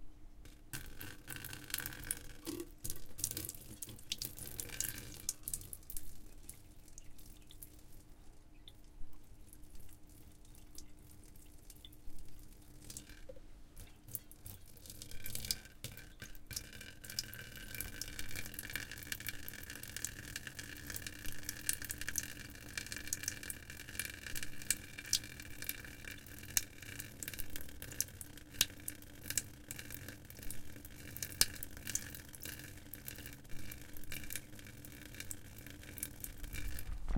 Water Dripping 03
Water dripping. Recorded with Zoom H4
water, soundeffect